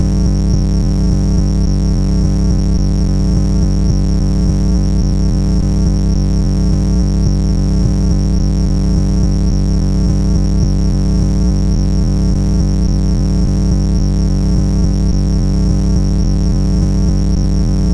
Electronic circuitry loop